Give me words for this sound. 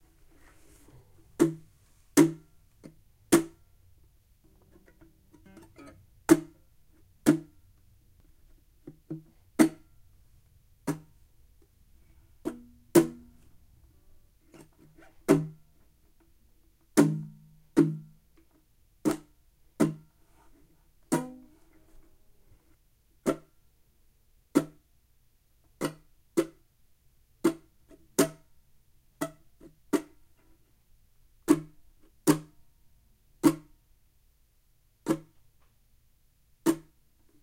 Equipment: Tascam DR-03 on-board mics
Some guitar 'chk' sounds (produced by completely muting the strings), on a somewhat larger guitar with a 'deeper' sound than guitar 1.